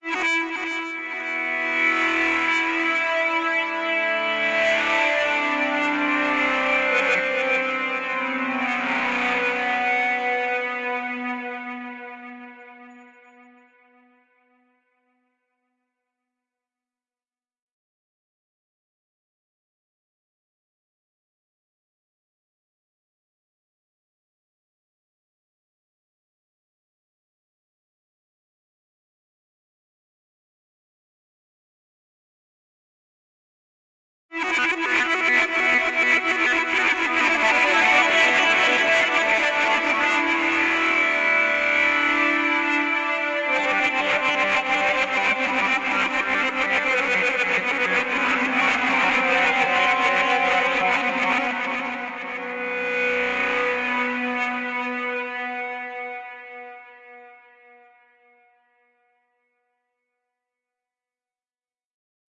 Absynths Guitar
Lightly distorted / waveshaped to get that crunchy Sound like an electric Guitar. It´s s Synthguitar from Absynth 5. Delay ( i guess i´ts Multitap ) give some space and make the sound useful for any composition